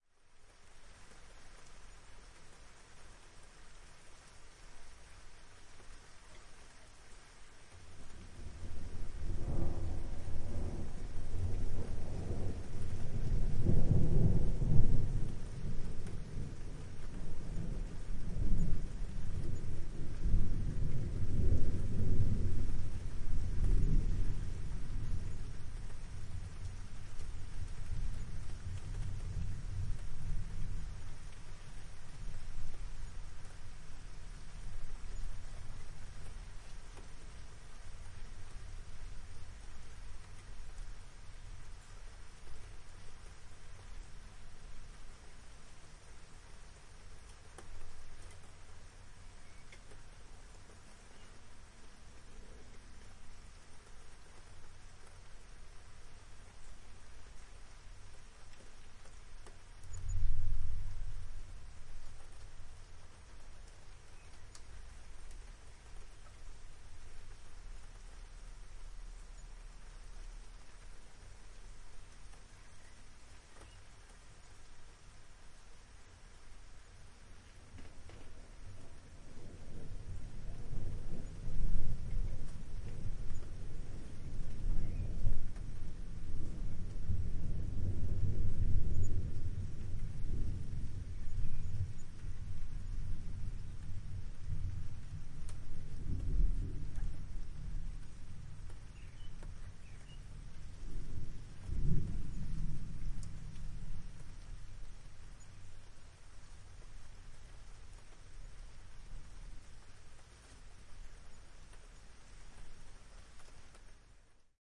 Light rain, wind and thunders 02
Summertime, light rain with heavy drops on the roof, light wind and distance thunders. Recorded with Neumann KM183 + Schneider Disc + Sound Devices Mix Pre 10 II.
rain, lightning, wind